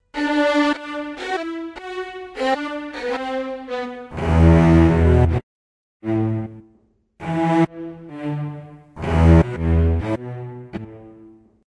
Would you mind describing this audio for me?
These are string samples used in the ccMixter track, Corrina (Film Noir Mix)